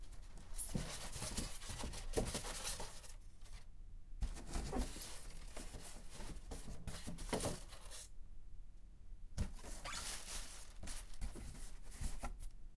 Rustling styrofoam
Styrofoam being rustled by curious hands. Wow that sounds kind of kinky.
office, rustle, rustling, soundfx